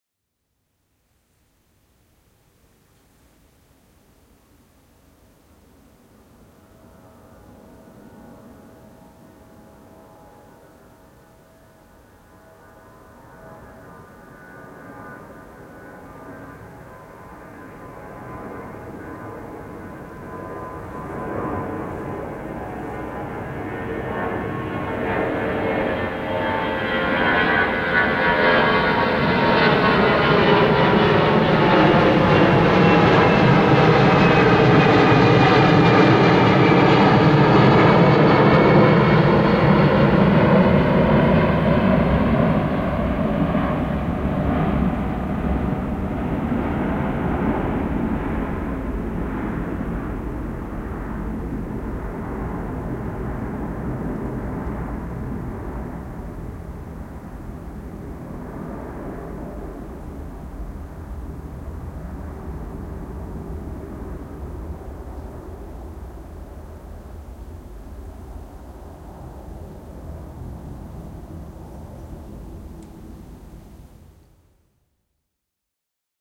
Record is done with digital HD Sony handycam HDR-CX105, 17.08.2011. at 21:53. This is loud evolving sound panning from left to right. Camera was faced front into the line of plane direction.
airplane, airport, anharmonic, aviation, comb, evolving, flying, jet, left, loud, low, night, noise, panning, recorded, right